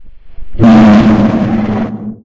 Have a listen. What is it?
Jumpscare SFX (3)
Scream, Scary, Monster